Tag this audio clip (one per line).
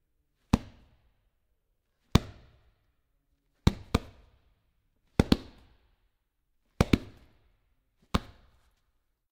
box
mat